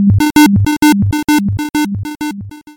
For this sound, I wanted to look for the effect of a failing machine and a repetition.
For that, I incorporated several sounds sinusoids and square with various tones. Then I added the effect to truncate silence, then equalization. Finally I added the repetition to stress this effect of failure then one melted in closure.